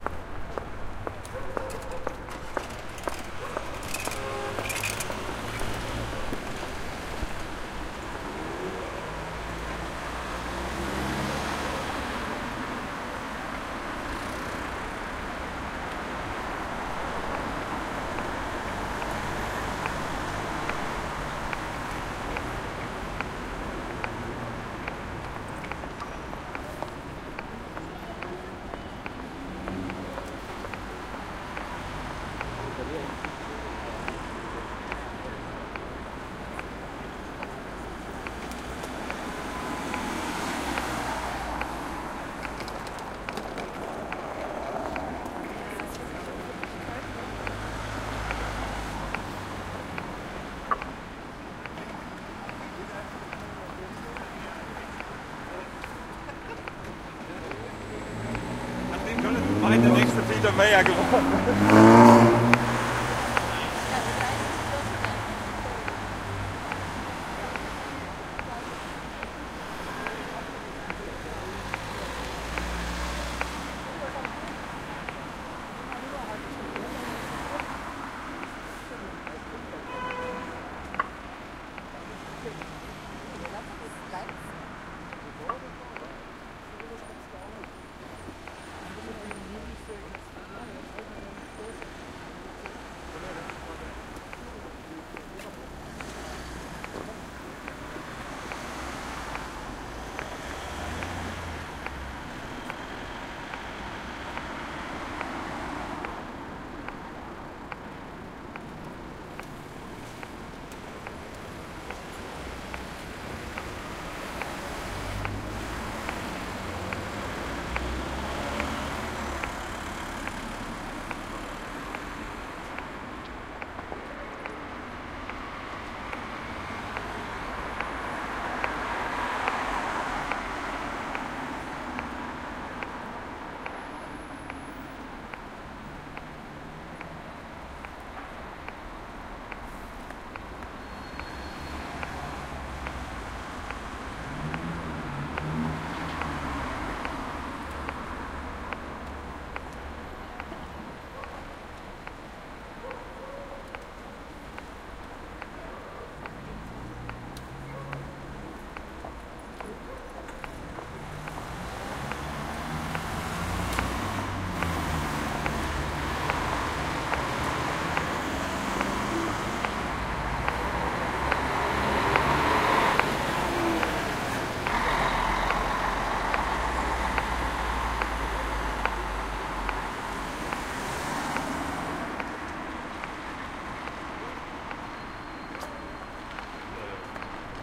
Berlin, Friedrichstraße Kochstraße crossroad amb XY
recording made in sunny day of February at Berlin, Friedrichstraße & Kochstraße crossroad near the traffic light - cars, people, clicks of the traffic light
made with Roland R-26 XY mics
ambience, Berlin, cars, city, field-recording, noise, people, soundscape, street, traffic